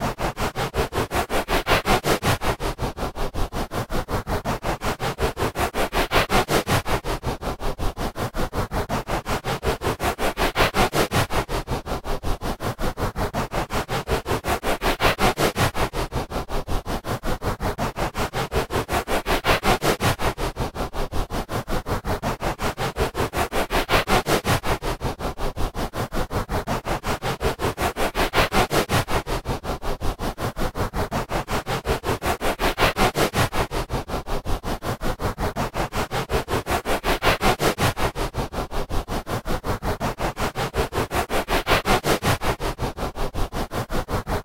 Rhythm sencer
noise, rhythm, sound, train